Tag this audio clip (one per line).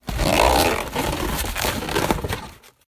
card
carton
box
cardboard
fast
rub
drum
board
break
pack
foley
package
card-board
natural
slow
eat
handle